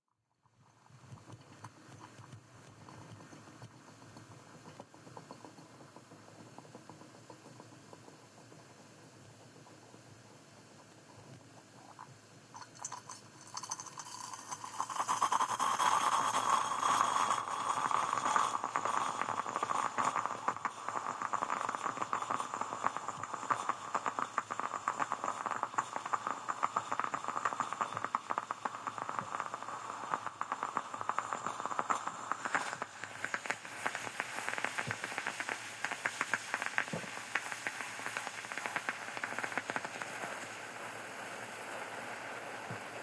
Italian coffee maker on the stove.